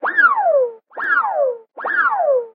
Cartoon whizz past, or slapstick fling something effect.
Three instances of the same sound effect. First instance has a rapid left to right pan. Second instance pans right to left. Third instance stays central. Cut 'n' Paste whichever one of the three that you like best.
Created with NI FM8 and Son of a Pitch VST inside FLStudio 11.04
Cartoon, Whizz